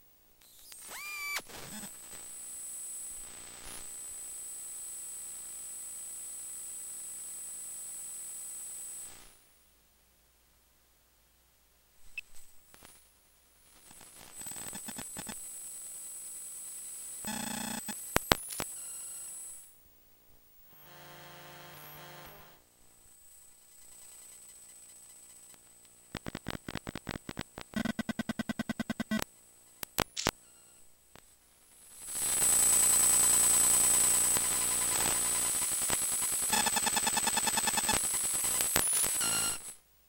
Phone pick up device stuck to various parts of digital camera during various functions, mostly zooming in and out, flash shots, memory card access.